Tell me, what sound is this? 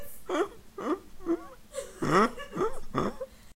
Me laughing strangely
human, laugh